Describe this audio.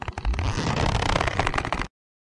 Leather Stress 4 (Long)
Leathery sound made from my keyboard pressing against clothing. Cleaned up audio to remove any unwanted noise. 3 other variations of this sound can be found within the "Leather Stress" pack. Recorded on Sony PCM-A10.
Movement, Creak, Jacket, Creek, Squeaking, Leather, Bending, Rub, Long, Foley, Gloves, Stress, Couch, Bag, Creaking, Bend, Twist, Twisting, Squeak, Rubbing